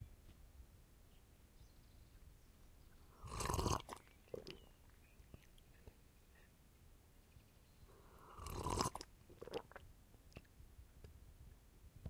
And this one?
Drink slurping sound from a cup
Drink slurping sound from a mug or cup of hot tea/coffee
sipping, drinking, beverage, slurp